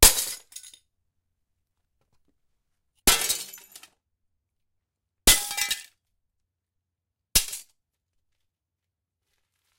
breaking glass (4x)
Recorded with H4 in garage. Plate glass broken with hammer
glass, crash